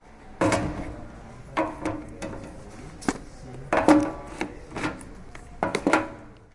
Movie-cart
Sound of some VHS movies left on the metal cart. Recorded with a tape recorder in the 1st floor of the library / CRAI Pompeu Fabra University.